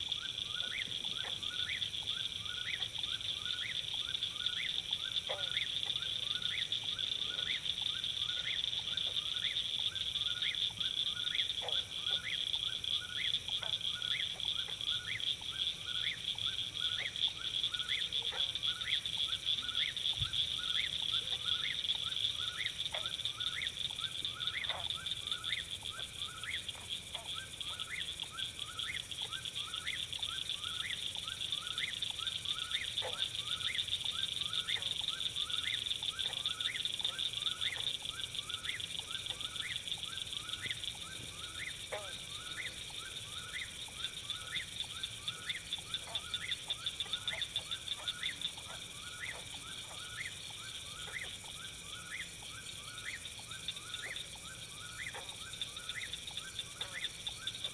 The increasingly rare melody of the Whipporwill....cricket frogs also. A wonderful summer soundscape, bringing back memories of days gone by. And, hopefully, a soundscape that will continue to add meaning to Summer childhoods.
So glad to hear this.
Recorded in late June of 2017 using the Sound Devices 702 and the Rode NTG-2 Shotgun microphone.